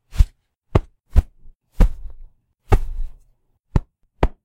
Oven Mitt Impact
Recording of a pair of oven mitts being hit together. Might be useful in a game as an inventory sound.
Used Audacity's noise removal filter to to remove background noise.
For this file, I isolated the samples I liked and left a small gap of silence between them for separation later.